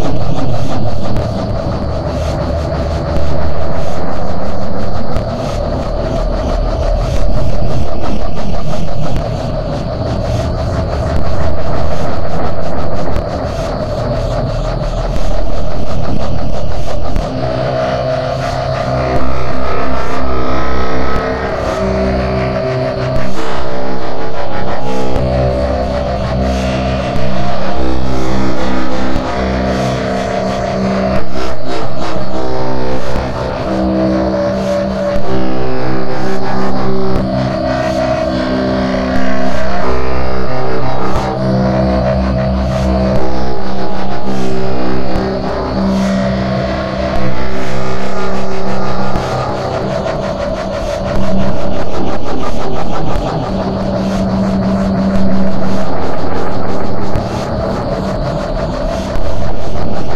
Psycho Laundry
Perhaps an industrial washer on the fritz...
The phone is filling up with sounds and I don't have the time to edit further.